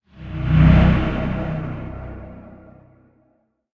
Mailinbox2017-love from her
System or Application Message or Notification
inbox, long, mail, psychedelic, science-fiction, smooth, unobtrusive